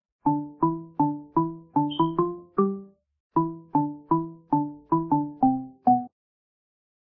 looping music for bgm of field level